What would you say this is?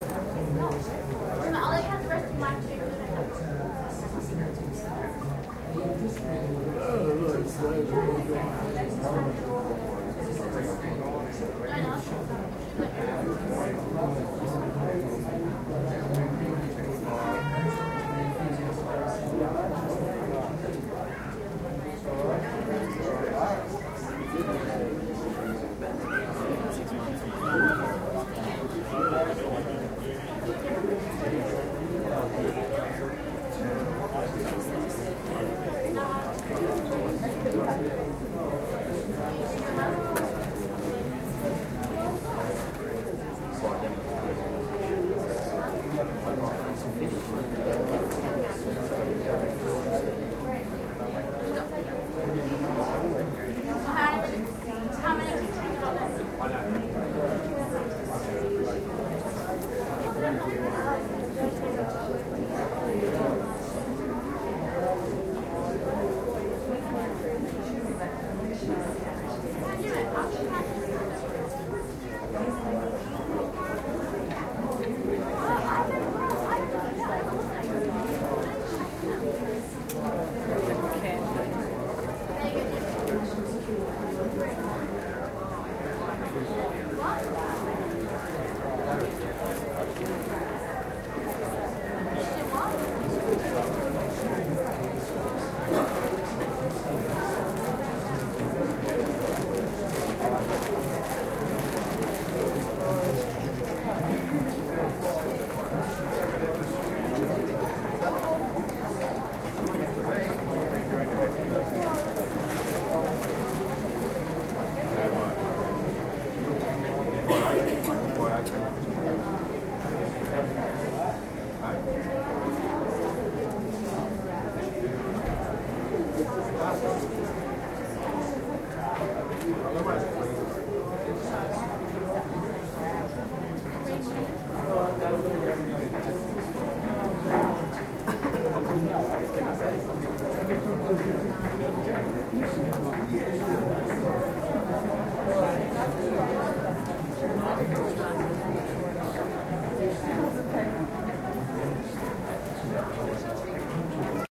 Airport Lounge Melbourne Australia
Waiting to board a plane in the gate lounge. Recording chain - Edirol R09HR internal mics.
air-travel, airport, airport-lounge, chatting, crowd, flying, people, talking, voices, waiting, waiting-room